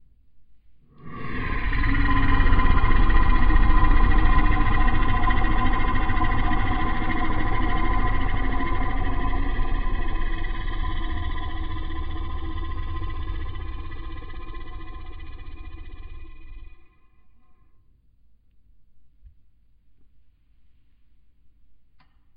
long Beastly growl effect
a drawn out growl. Should be good for adding to audio plays or videos. Made by growling into mic then slowing it 75 times in audacity. added some base and treb for effect.
growl, Monster, scary, snarl